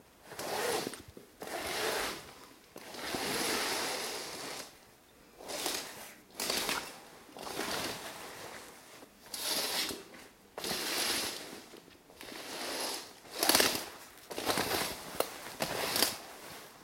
Curtains Heavy
Curtains being used.
open, heavy, house, curtains